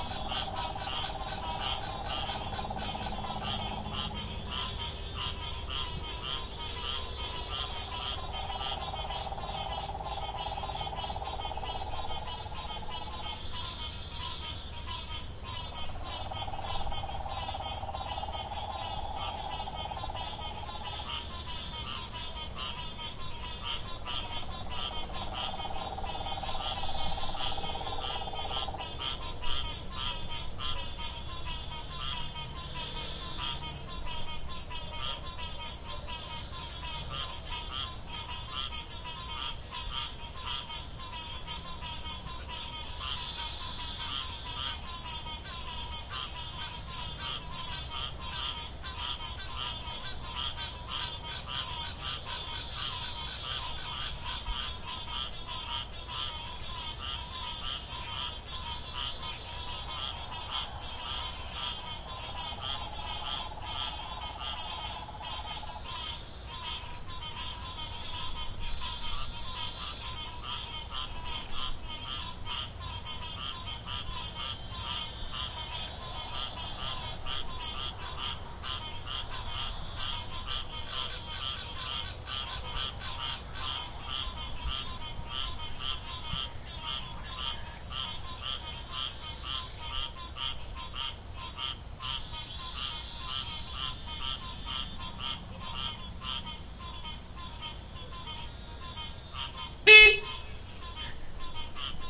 Different spot in the woods recorded with laptop and USB microphone. Searching for what sounds like a woodpecker in the distance.